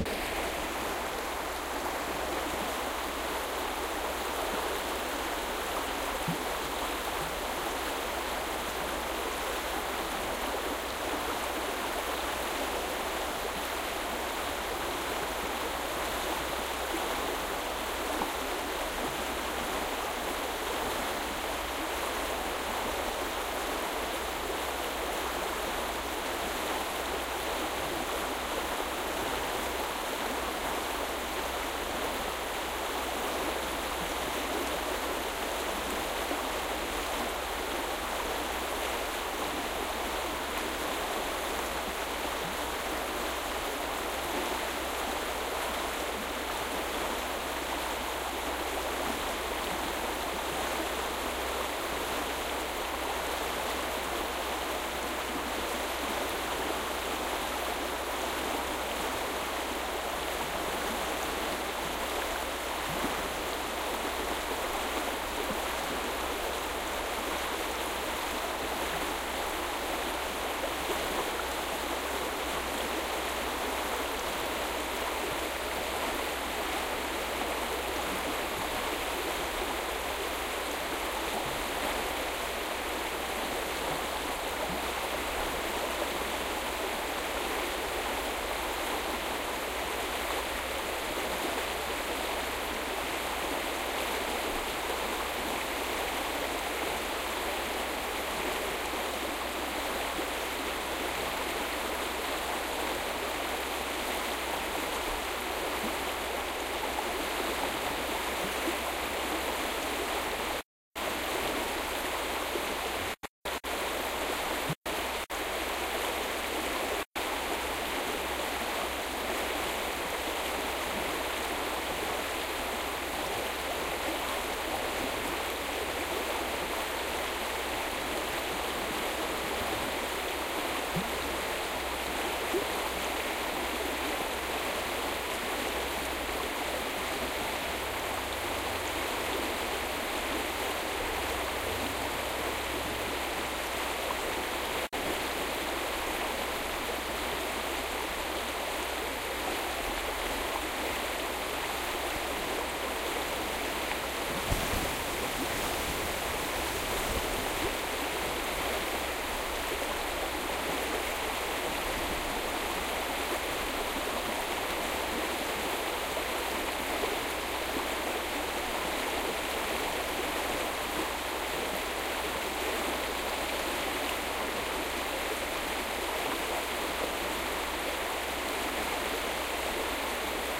The River Tay emerges from Loch Tay
at Kenmore, and flows from there to Perth which, in historical times,
was the lowest bridging point of the river. Below Perth the river
becomes tidal and enters the Firth of Tay. This bit was recorded near Kenmore in October 2007 with the Soundman OKM II microphone and a Sharp Minidisk recorder.